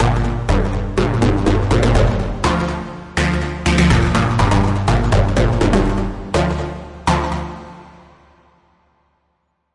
sydance10 123bpm
stabs, music, intro, dance, radio, techno, jingle, part, broadcast, pattern, chord, interlude, dancing, move, drop, sample, beat, podcast, loop, background, instrumental, club, dj, trance, mix, trailer, disco, sound, stereo, pbm